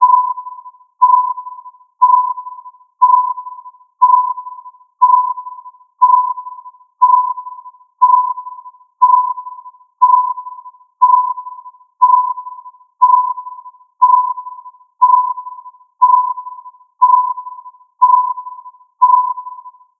Radar, Something Detected, 20 Sec
Radar, Something Detected...
If you enjoyed the sound, please STAR, COMMENT, SPREAD THE WORD!🗣 It really helps!
sonar, sea, detected, navigation, presence, signal, activity, technology, military, scanning, radiolocation, underwater, surveillance, war, radar